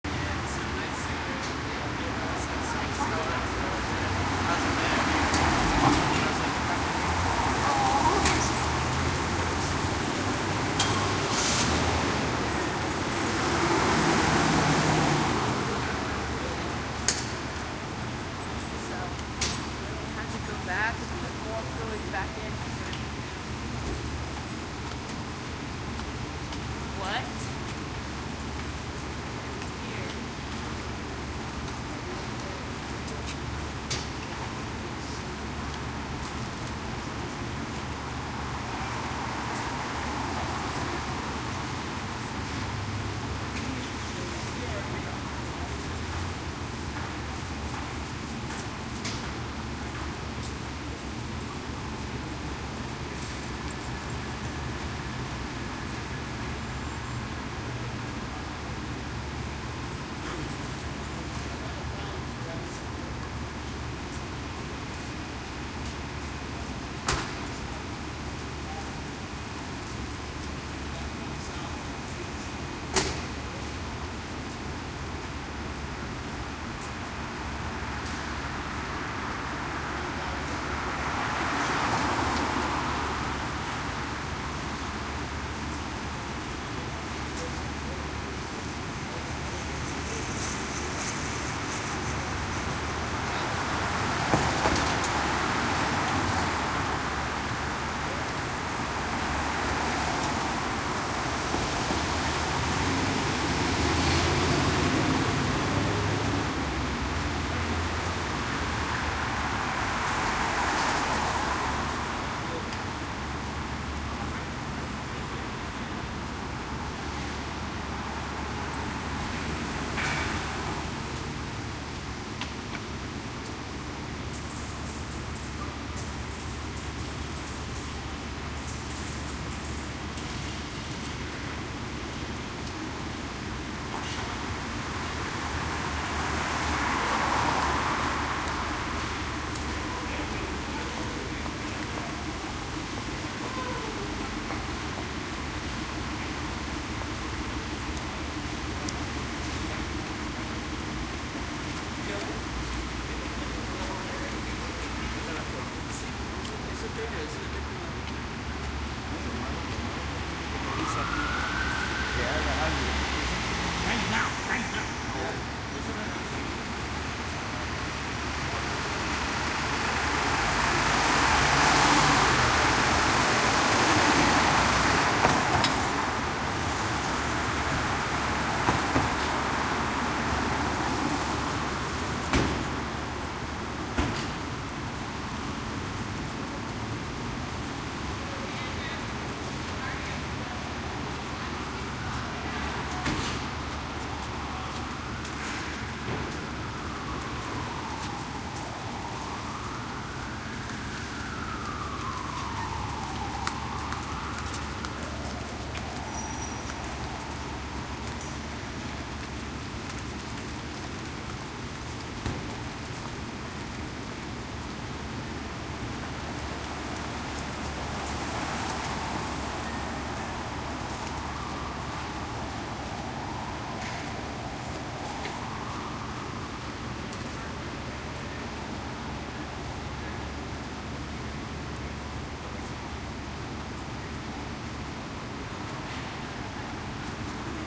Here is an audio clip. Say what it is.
street quiet side street one way and people NYC, USA
NYC, one, people, quiet, side, street, USA, way